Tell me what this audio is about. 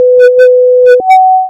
Generate> sound> sinusoid of frequency 530 Hz, amplitude 0.8 , of 1 second.
Repeat this step by changing the frequency :
Generate> sound> sinusoid of frequency 250 hz of 1 second.
Generate> sound> sinusoid of frequency 350 hz of 1 second.
Generate> sound> sinusoid of frequency 550 hz of 1 second.
Effect> Paulstrech > Stretching factor : 25 ; resolution : 0.05
Effect> normalize > - 10 db
Readjust and removing non- significant parts :
remove between 7 and 24 seconds ; between 12 and 31, etc to our liking.